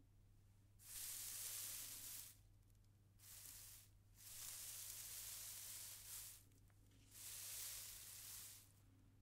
sweeping floor with a straw broom
broom, floor, straw, sweeping, tile